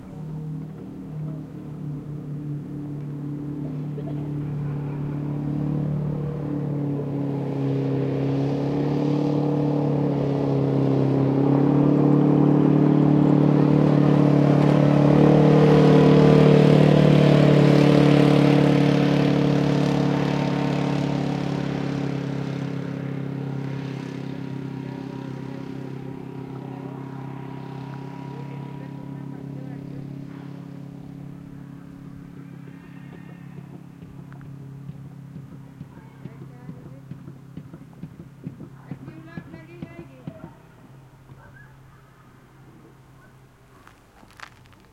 Honda ATV pass by slow
ATV, Honda, pass, slow